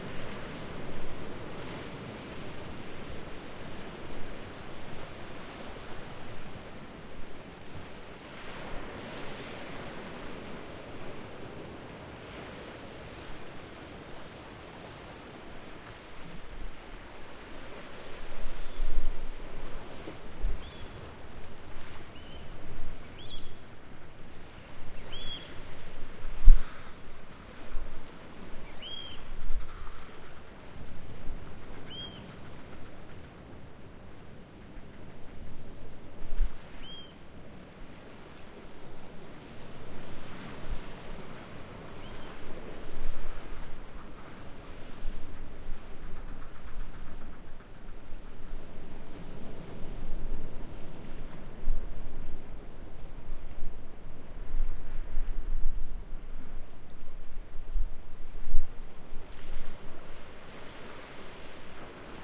Orkney, Brough of Birsay A
Field recording on the Brough on a relatively windless day